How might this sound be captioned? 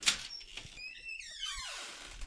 A fly screen door being opened.